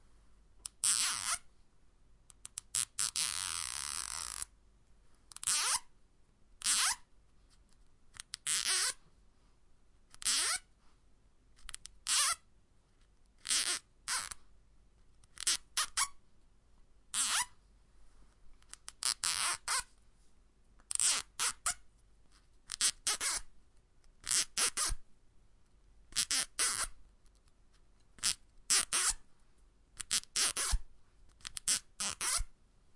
small-cable-tie
Small-sized cable ties being closed at different speeds.